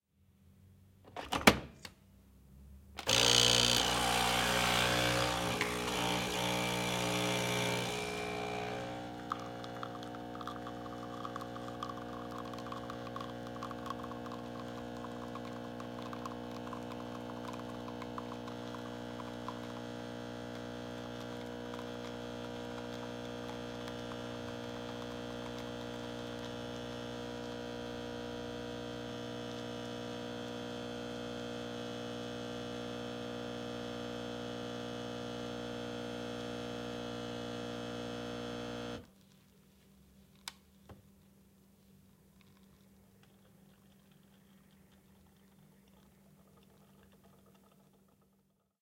Maquina de café Nespresso
caf, coffe, machine, maquina, nespresso